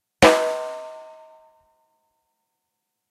samples in this pack are "percussion"-hits i recorded in a free session, recorded with the built-in mic of the powerbook
drums, snare, unprocessed